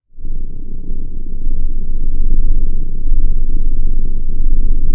Synthetic low fi rumble